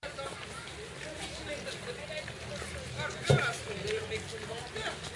field recording [short]
i went to a zoo and recorded a few things
recording, zoo, field